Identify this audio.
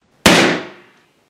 Made by popping balloon and layering and adjusting the sounds. Recorded with Cannon Vixia HF R50 and edited by Adobe Premiere Elements. Recorded outside.